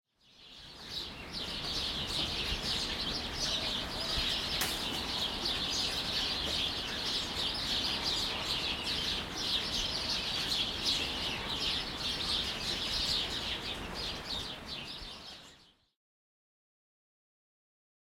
Birds in Montreal
Birds in Parc-Extension in Montreal
ZoomH1
2018
soundscape, field-recording, ambient, ambiance, birds, atmosphere, ambience, general-noise, city